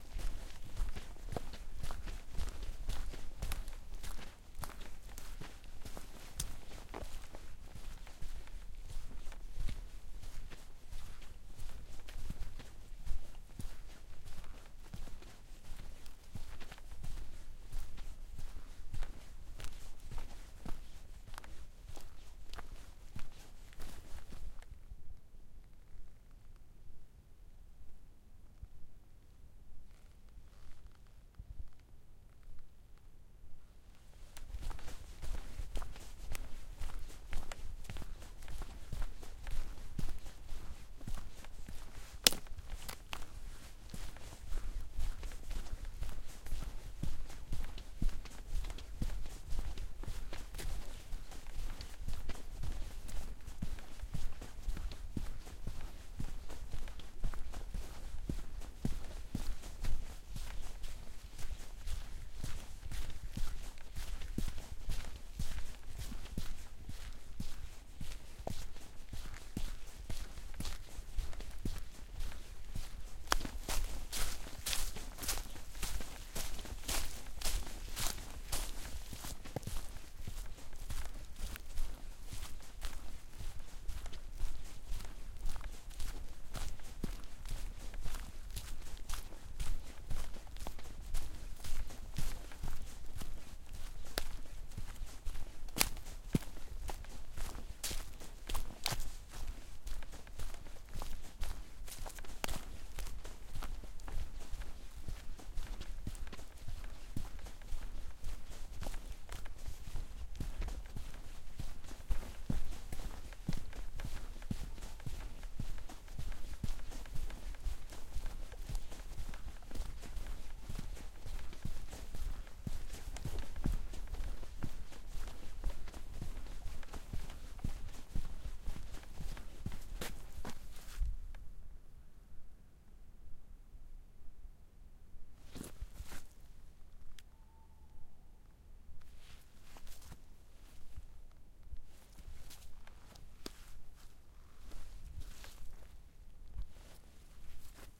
Steps through branches and mud on a quiet forest path 2
This sound recording was also made on a forest path on which I went for a walk in the evening. You can hear different surfaces on which I walk like mud, leaves or branches.